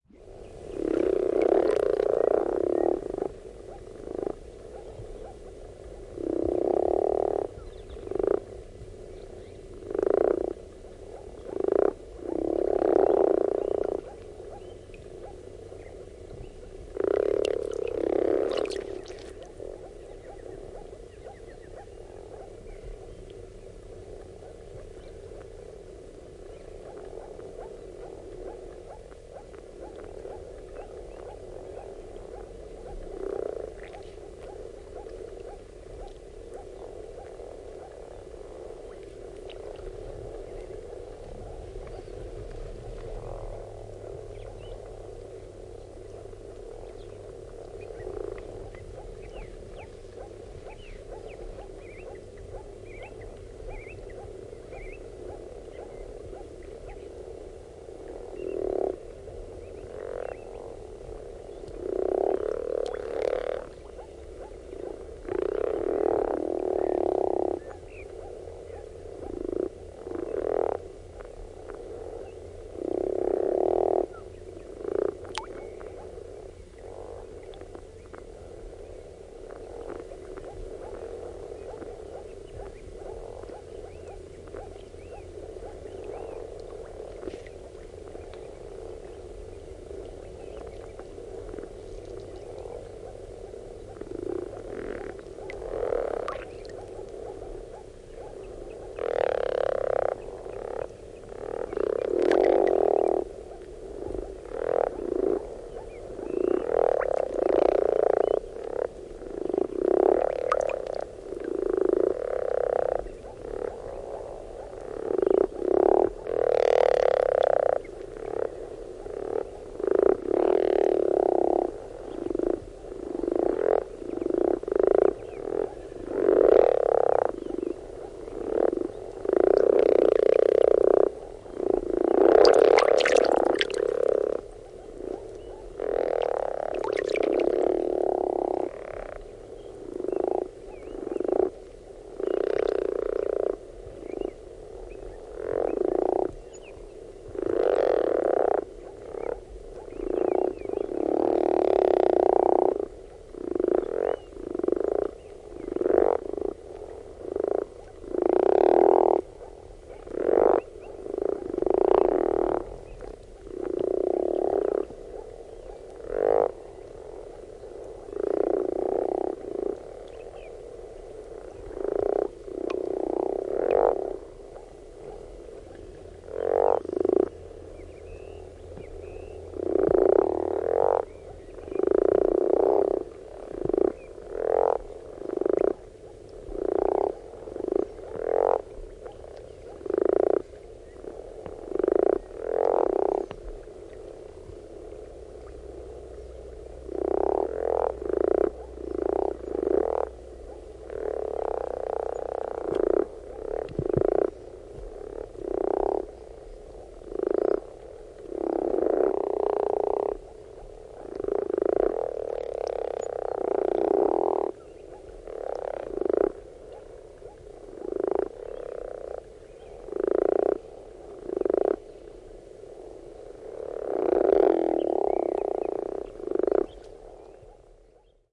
Frogs croaking // Sammakot kurnuttavat
Sammakoiden kurnutusta lähellä. Vesipisaroita. Taustalla kaukana vaimeana viitasammakoita.
Place/paikka: Finland / Suomi
Date/Aika: 1975
kurnuttaa, frog, croak, finnish-broadcasting-company